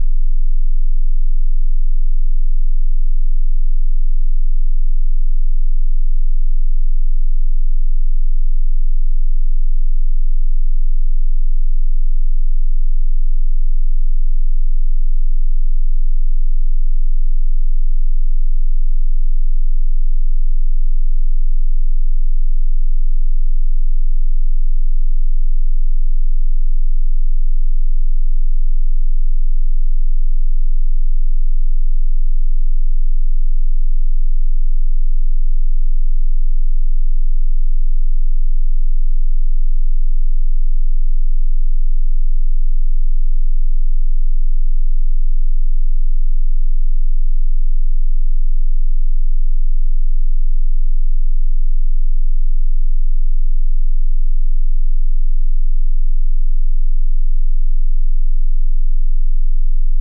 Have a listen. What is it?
20Hz sine wave
Pack of sound test signals that was
generated with Audacity
sound, signal, Test